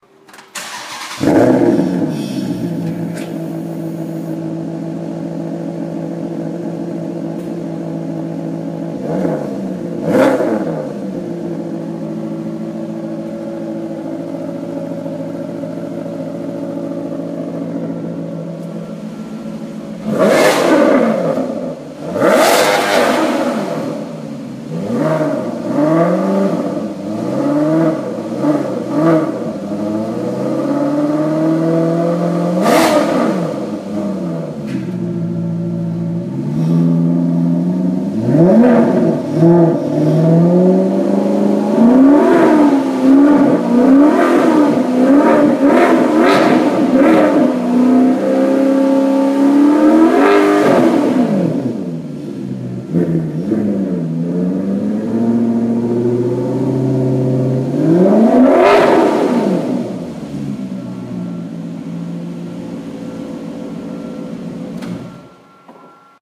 Maserati GranTurismo S, V8 engine starting, revving and idling.
start, GranTurismo, motor